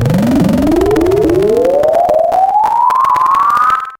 Alternate sine wave created and processed with Sampled freeware and then mastered in CoolEdit96. Mono sample stage eight- a rising, undulating, shimmering object of alien design or the thought process as it leaves at the back of your head as you implode.
sac, larry, hackey, sine, sack, sound, synthesis, free, sample, hacky